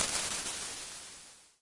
Artful impulse responses created with Voxengo Impulse Modeler. Hat shaped building for singing and dancing in. A room without a clue.